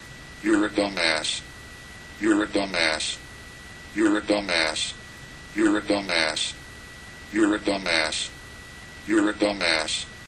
YOU'RE A DUMBASS
robot, weird, poot, flatulence, fart, beat, computer, voice, gas, space